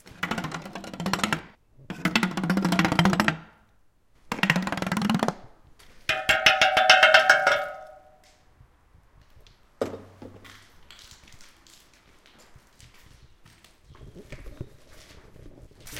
In/around an abandoned ballroom not far from Berlin.
Running a stick through the rungs of wooden and metal objects.